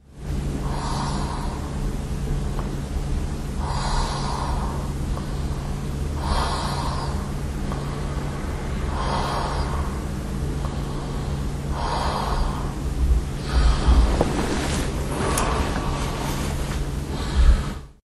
Moving while I sleep. I didn't switch off my Olympus WS-100 so it was recorded.
bed, noise